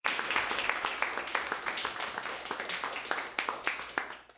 A Nova Encore Claps Clap Applause Cheer - Nova Sound
Erace, Hi, A, The, Hip, Snare, Loop, Hat, Drums, Propellerheads, Clap, Nova, Kick, Encore, Cheer, Claps, Sound, Drum, Hate, Beat, Hop, Percussion, Bass, Applause